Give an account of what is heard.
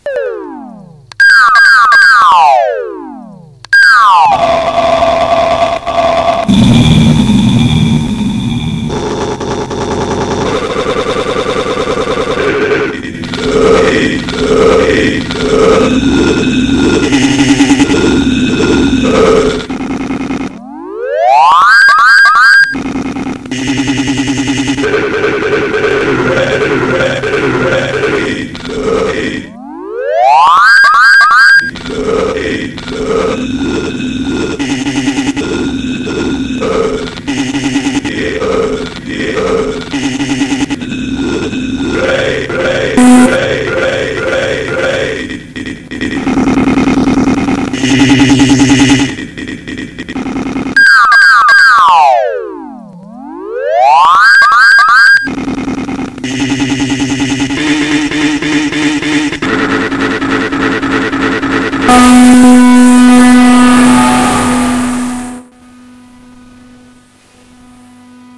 Part of robotic music on a nameless bar on a nameless place. Not even Skywalker puts his feet there. Different music, not amusing for humans, but robots seem to have taste for this noise.
robots, songs, androids, music